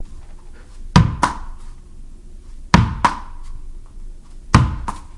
wall ball
hitting a ball to a wall
ball, bouncy, wall, wall-ball